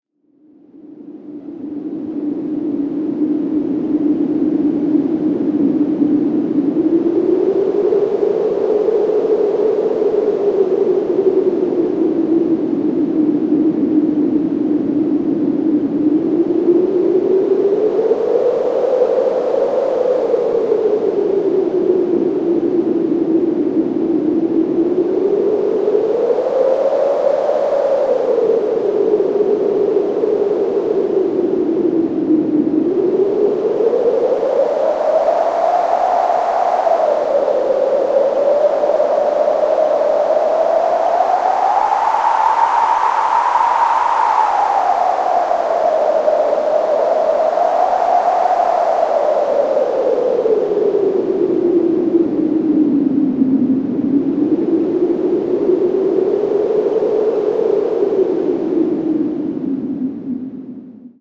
High wind01
Wind sound varying in strength. Made on a Waldorf Q rack.
atmosphere, gale, hurricane, storm, synthesizer, synthetic, waldorf, weather, wind